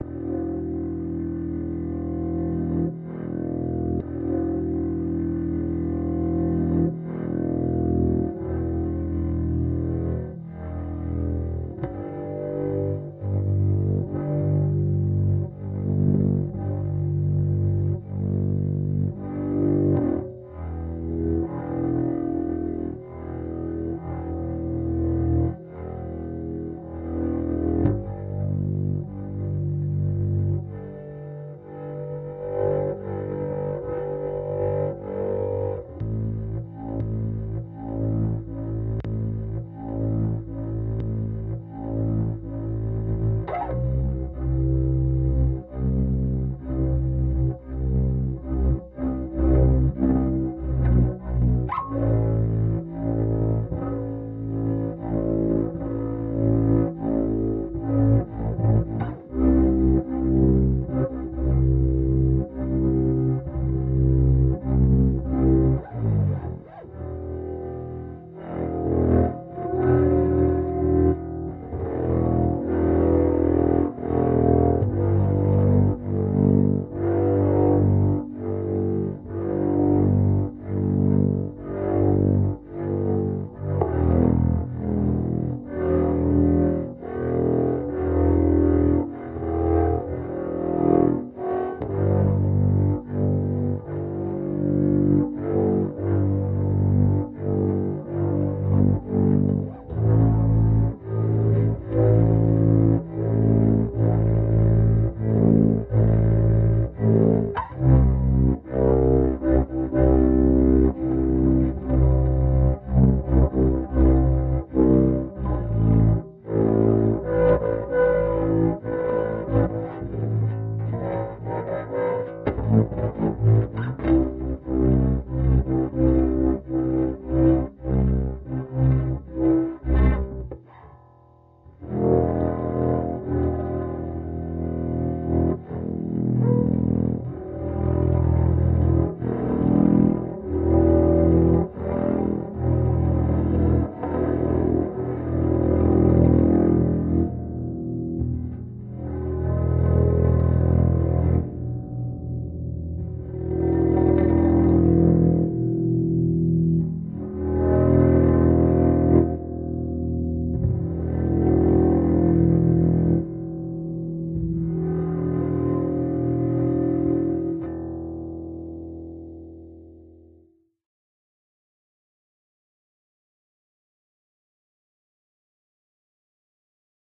bowed bass-9
I took a viola bow to washburn bass
viola, bass, electric